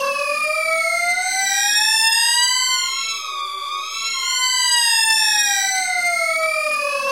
Mangled sounds from Phone sample pack edited with cool edit and or voyetra record producer and advanced audio editor. Gliding pitch shift. Channels independently stretched and reversed. Added flanger.
processed, voice